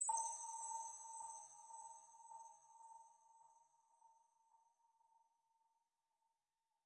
Result of a Tone2 Firebird session with several Reverbs.